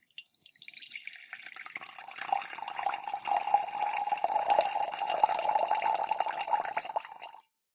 pouring water 01
Pouring Water from one graduated cylinder into another. Recorded with an iPhone 4S and used (Noise Removal) Effect in Audacity.
bloop, drip, liquid, science, soundEffects, trickle, water